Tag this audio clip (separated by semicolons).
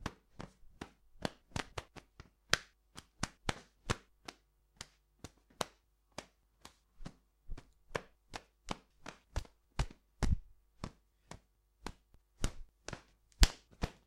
body-hit; fall; fight; impact; punch; thud